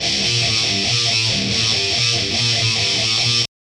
THESE LOOPS ARE ALSO 140 BPM BUT THERE ON A MORE OF A SHUFFLE TYPE DOUBLE BASS TYPE BEAT OR WHAT EVER YOU DECIDE THERE IS TWO LOOP 1 A'S THATS BECAUSE I RECORDED TWO FOR THE EFFECT. YOU MAY NEED TO SHAVE THE QUIET PARTS AT THE BEGINNING AND END TO FIT THE LOOP FOR CONSTANT PLAY AND I FIXED THE BEAT AT 140 PRIME BPM HAVE FUN PEACE THE REV.